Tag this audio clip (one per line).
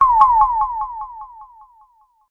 8bit
laser
retro
shot
arcade
videogame
beam
game
video-game
shoot
spaceship
nintendo
weapon
gun
cartoon
games
shooting